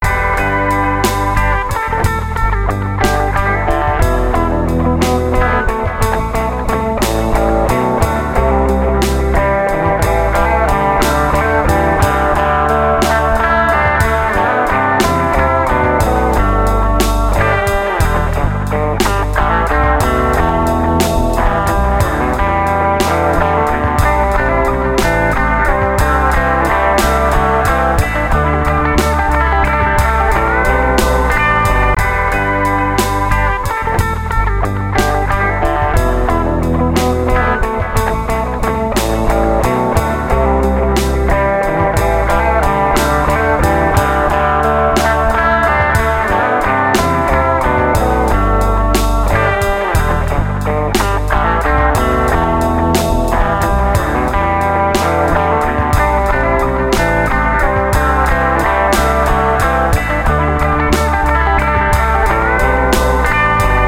Kicked out some old school 60' shoo-op for ya'll. 120 BPM key of G.